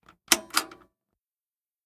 Projector Button Push
A button on a Kodak projector being pushed